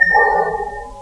Bonks, bashes and scrapes recorded in a hospital at night.